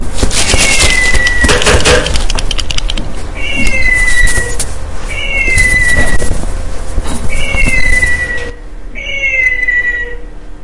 SoundScape GPSUK Jahkeda,Josephine,Lily 5W
cityrings, galliard, soundscape